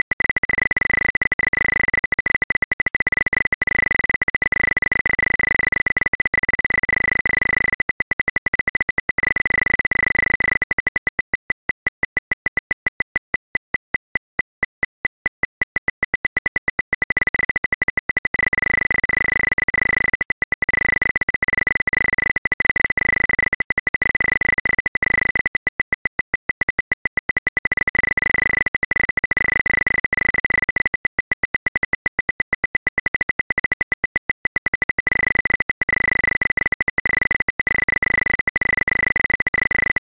Simulated Geiger Counter Beeps
Geiger counter going crazy. Beep created in Audacity and sequence created in sox/bash.
Plaintext:
HTML:
contaminated, contamination, counter, fake, geiger, geiger-counter, nuclear, nuclear-waste, radiation, radioactive